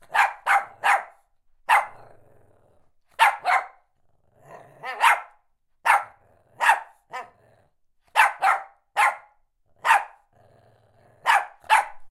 Dog Shih Tzu Bark Series 02
Shih Tzu dog, barking
Bark, Barking, Shih-Tzu, Animal, Dog